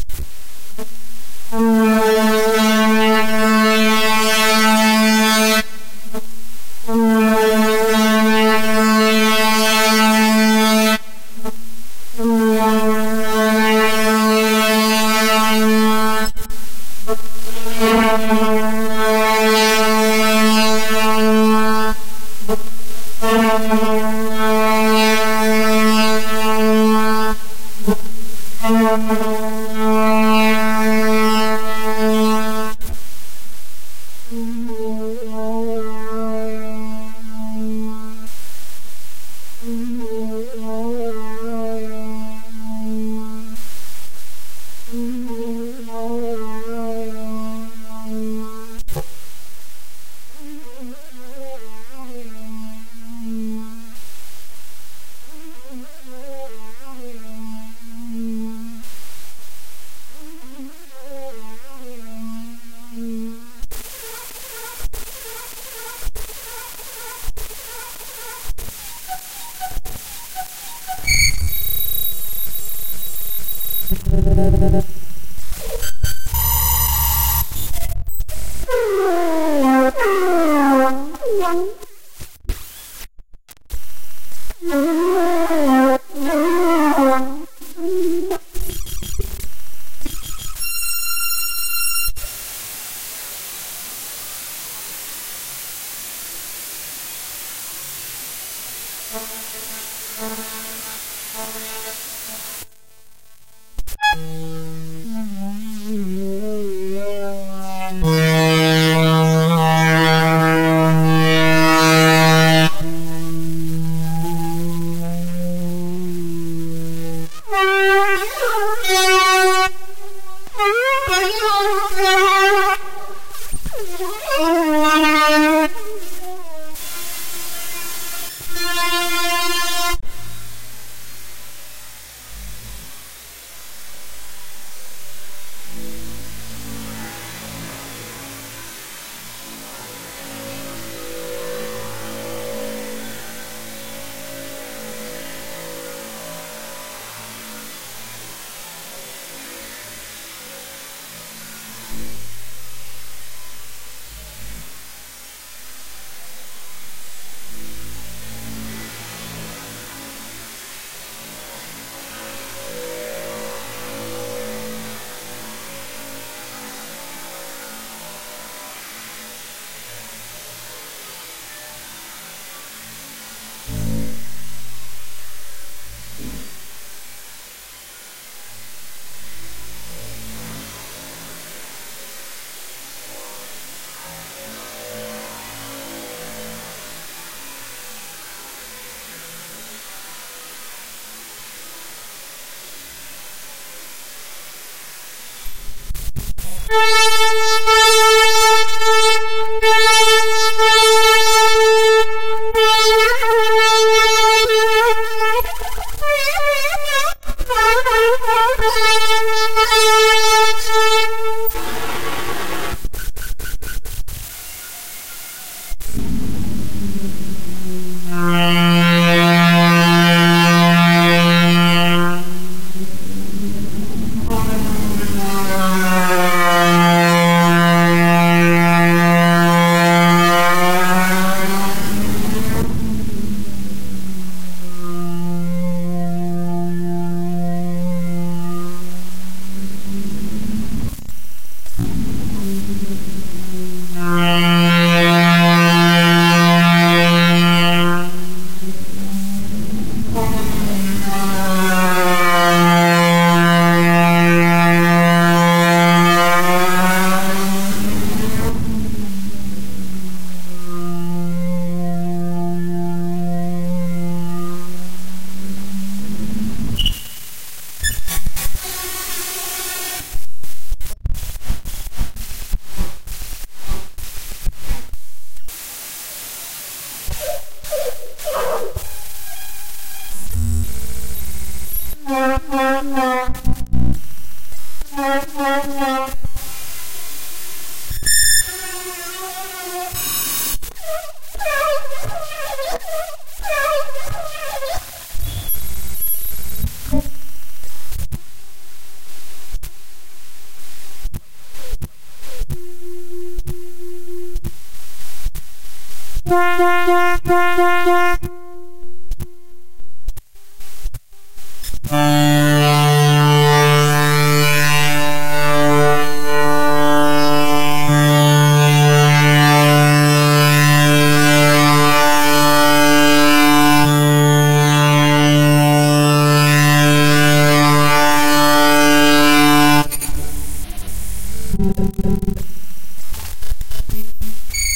dare-26 psd file1
Large set of PSD files copied to one folder and glued together using command "copy /b *.psd output.raw".
Strongly dehissed, normalized.
dare-26, raw, noise, pcm, glitch, harsh, psd